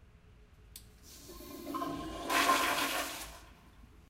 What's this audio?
flushing toilet
the sound of a motion-sensor toilet when it automatically flushes. recorded with SONY linear PCM recorder in a dormitory bathroom stall. recorder was held about two feet away from toilet.
aip09, bathroom, dormitory, water